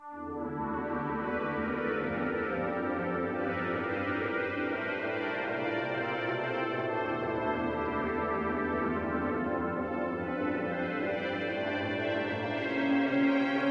Micron Pad Attack
Alesis Micron Stuff, The Hi Tones are Kewl.
acid, alesis, ambient, base, bass, beats, chords, electro, glitch, idm, kat, leftfield, micron, synth